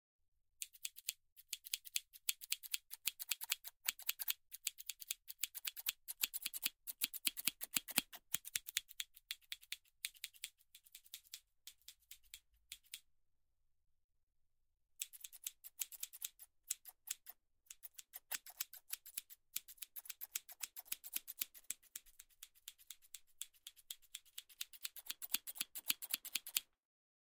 Scissors cutting around ears, left to right, binaural
A binaural recording of scissors cutting around the head in binaural
Barber, Cutting, Hair, Haircut